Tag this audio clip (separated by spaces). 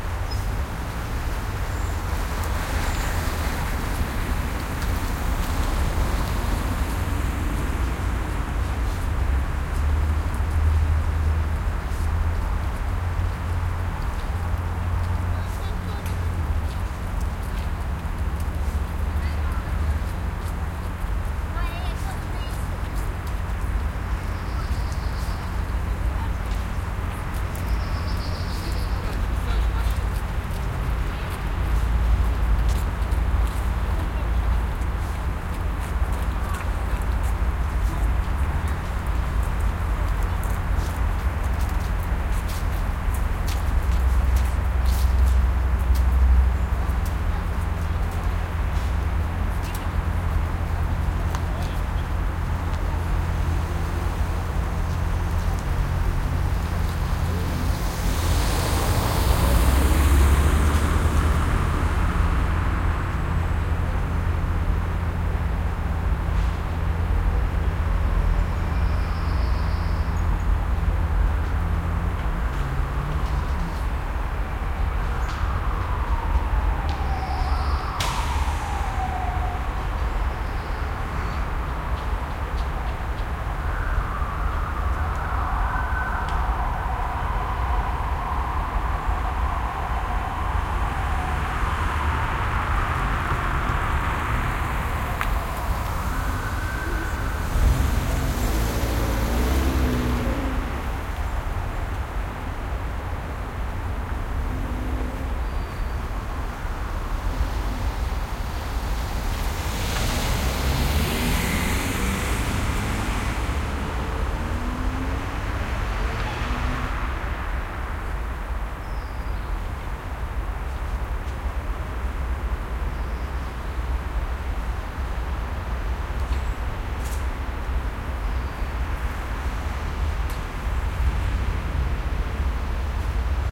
children kids playground playing traffic